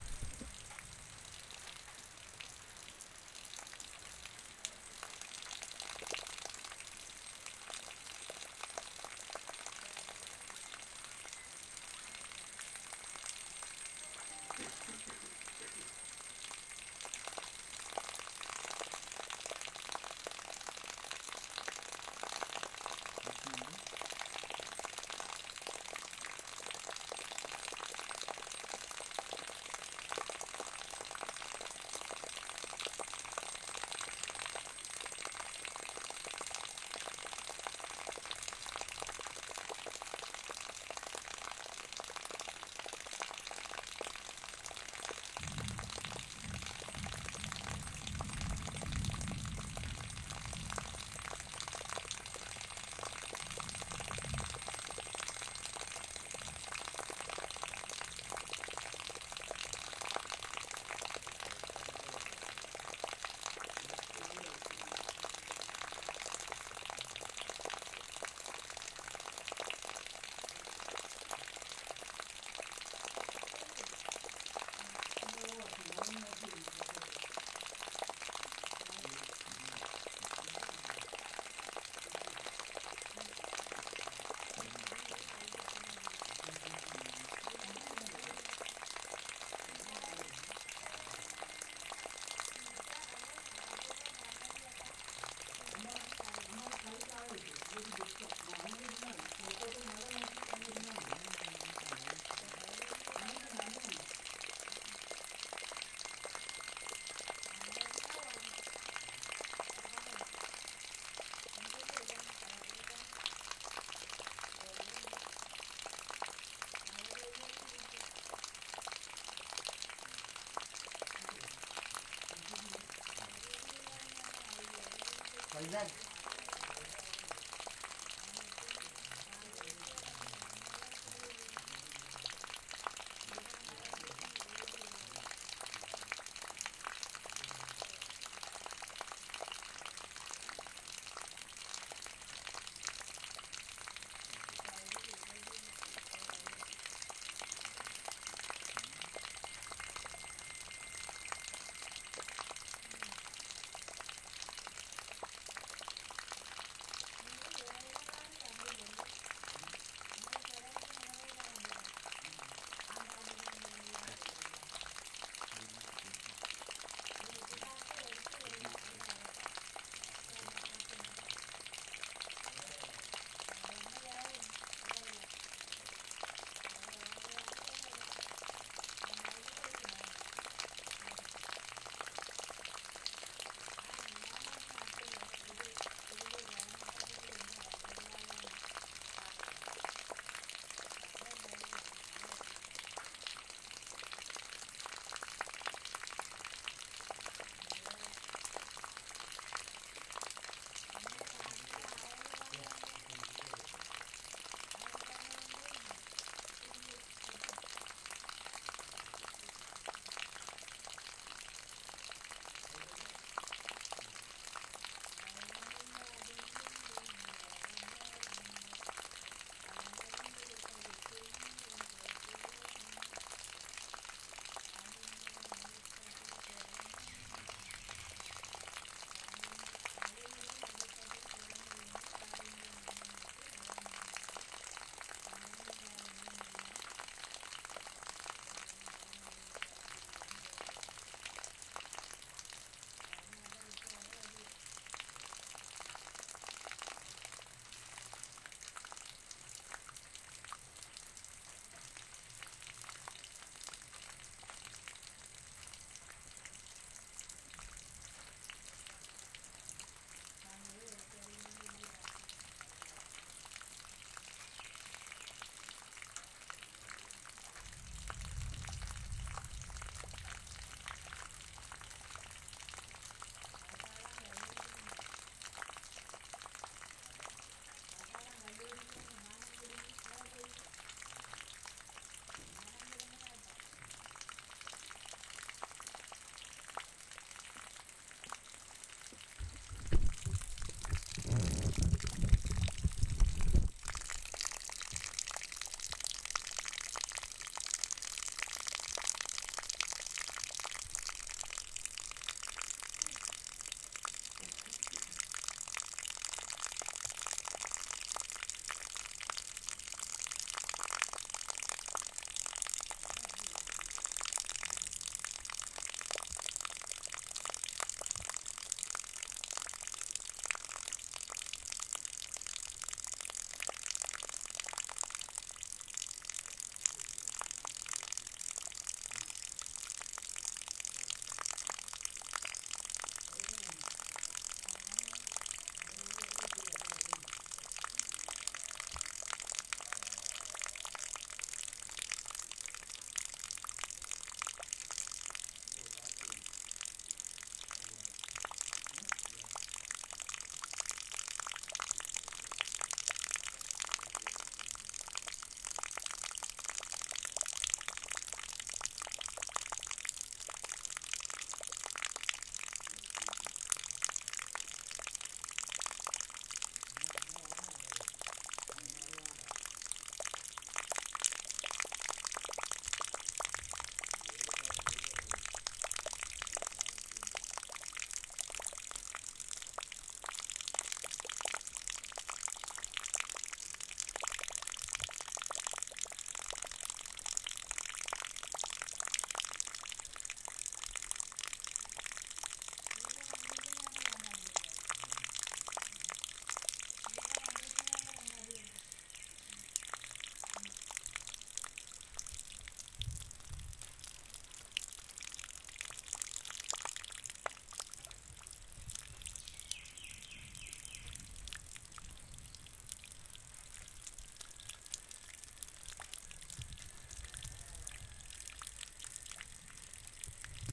Water drip
Recorded in kerala forest in India.